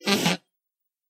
hf junkharmonica c
A traditional spasm band instrument: A comb with a piece of paper over it.